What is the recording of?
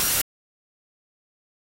Viral Hi Hatter 01
hi, hat